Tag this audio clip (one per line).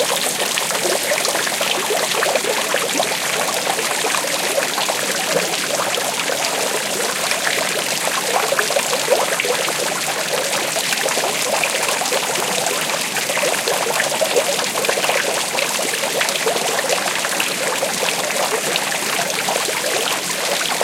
field-recording
fountain
sevilla
splashing
water